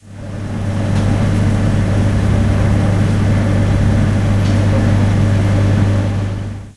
Noise of a vending machine motor.
UPF-CS12, campus-upf, motor, vending-machine